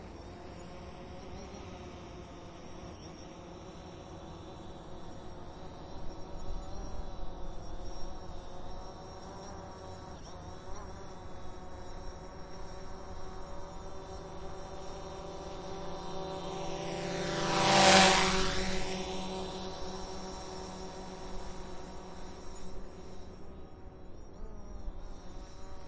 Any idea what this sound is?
UAS Drone Pass 03
Recording of a DJI Phantom 4 Pro flying by. Recorded with a Tascam DR-40